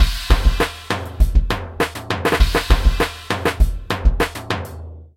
100 BPM Insistant drum fill 2 mix
Fill for Frenetic Brush Beat 100 BPM Created with DP & MACH 5
frenetic, fill, beat, 100